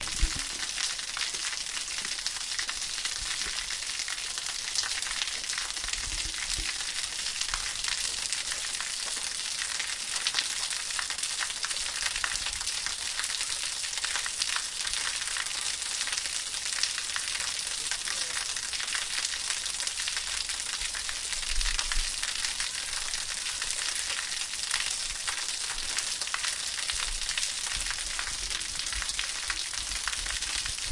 Small waterfall next to a shrine in Todoroki Valley, Japan. Recorded with Zoom H1 recorder.
waterfall, nature, todoroki